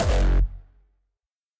Hardstyle Kick Sylenth1 02 ROOTNOTE F2
kick, harder, drum, hard, edm, bass, hell, distorted, styles, kickdrum, hardcore, hardstyle, clip, distortion, headhunterz, harhamusic, hex, harhamedia, shores